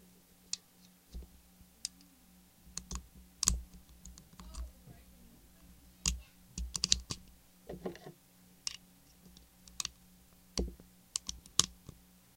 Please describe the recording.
Loading Magazine
Loading the magazine of a Smith and Wesson 9MM.
Ammo, Gun, Loading, Magazine, Pistol, Smith-Wesson